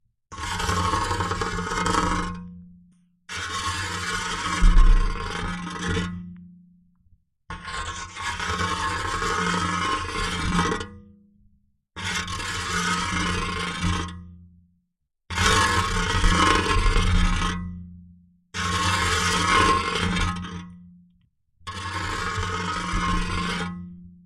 MetalScrape-Piezo2
Metallic scraing sound. Contact microphone recording with some EQ.